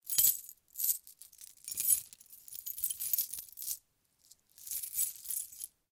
Keys noises recorded with an AKG 414 through Apogee Duet.
keys, key, door, unlock, noises, lock